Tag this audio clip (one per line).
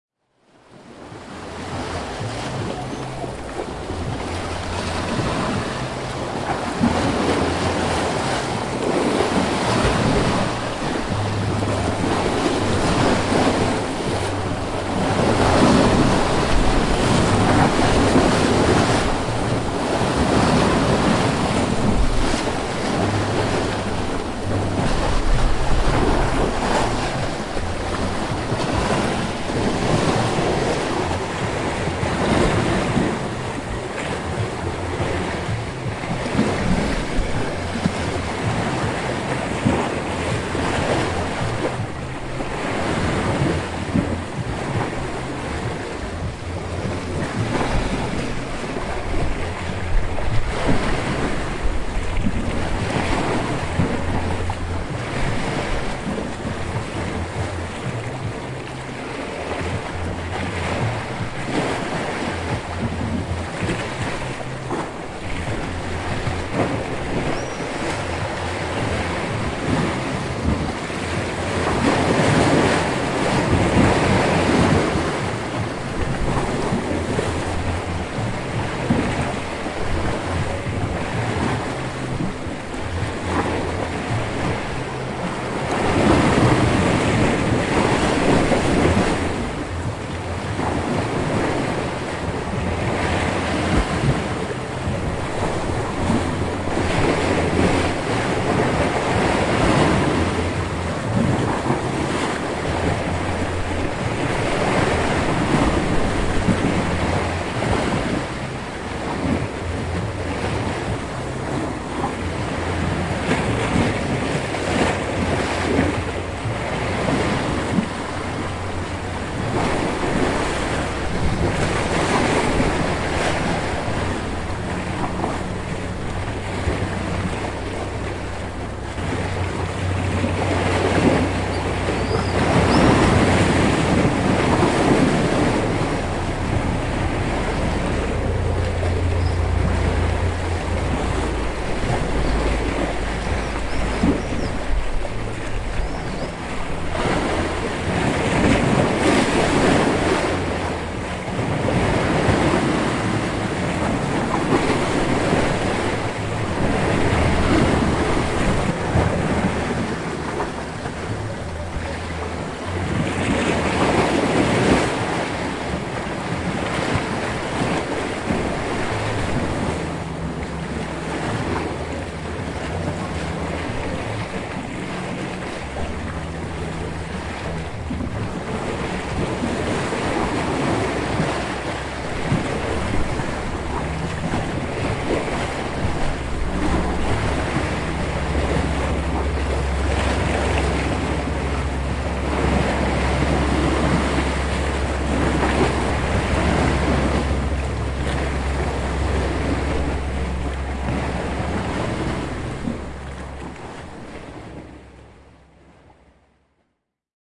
Rocks
Wall
Adriatic
Waves
Ocean
Beach
Splash
Sea